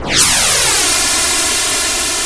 Sounds like an incoming missile flying towards the player. Created using SFXR
game, sfx, 8-bit, arcade, video-game, 8bit, chip, noise, sfxr, retro, video